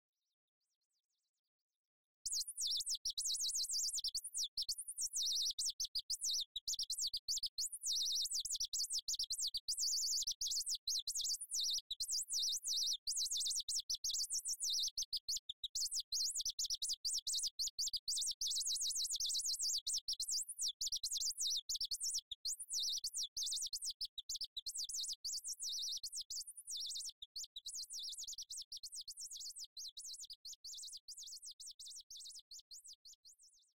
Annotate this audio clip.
A sample of a Bird singing. This was created using FabFilter Twin 2 and was generated using my own patch, after a session of experimenting with the Fab filters.